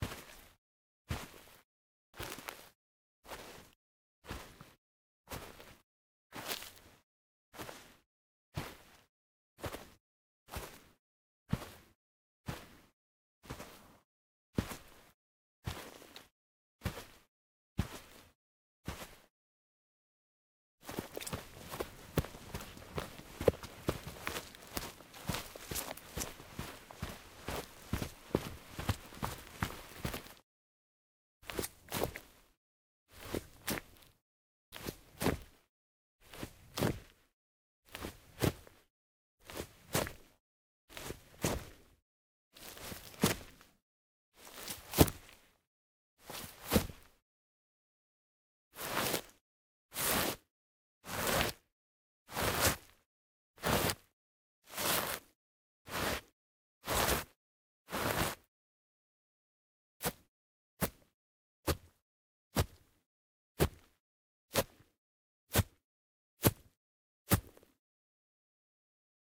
Footsteps Mountain Boots Grass Mono
Footsteps sequence on Grass - Mountain Boots - Walk (x19) // Run (x22) // Jump & Land (x10) // Scrape (x9) // Scuff (x9).
Gear : Rode NTG4+
short, steps, scuff, scrape, foot, shoe, boots, mountain, feet, grass, walk, walking, crunch, running, foley, footstep